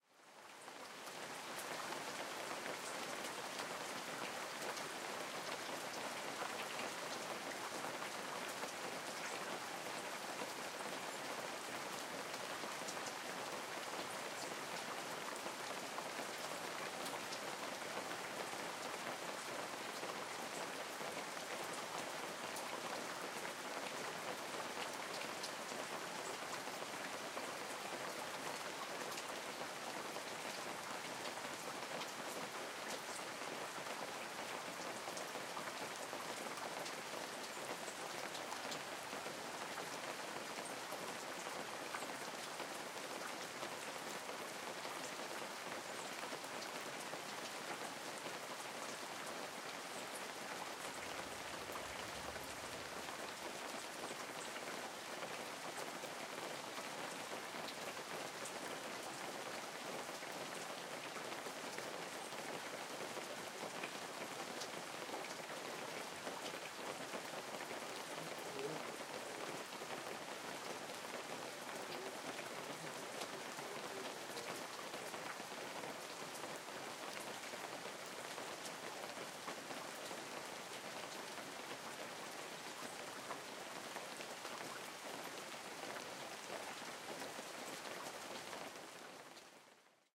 Rain light 1 (rural)
Rain dropping light. Rural land, without any surrounding sounds. Useful like background. Mono sound, registered with microphone Sennheiser ME66 on boompole and recorder Tascam HD-P2. Brazil, september, 2013.
atmosphere, background, BG, cinematic, drops, field-recording, FX, light, mono, rain, rural, Sennheiser-ME66, Tascam-HD-P2